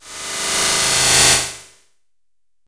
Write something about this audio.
Electronic musquitos G#5
This sample is part of the "K5005 multisample 18 Electronic mosquitoes"
sample pack. It is a multisample to import into your favorite sampler.
It is an experimental noisy sound of artificial mosquitoes. In the
sample pack there are 16 samples evenly spread across 5 octaves (C1
till C6). The note in the sample name (C, E or G#) does not indicate
the pitch of the sound. The sound was created with the K5005 ensemble
from the user library of Reaktor. After that normalizing and fades were applied within Cubase SX.
reaktor, mosquitoes, multisample, noise